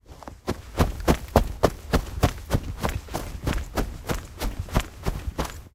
Snow Footsteps Running
Sprinting in the snow while holding the recorder by the tripod, which was a bad choice and resulted in some handling noise. Also some backpack clicking but might still be usable.
Recorded with a Zoom H2. Edited with Audacity.
Plaintext:
HTML:
boots, fast-movement, foot, footsteps, noisy, run, running, shoe, shoes, snow, sprint, step, walk, winter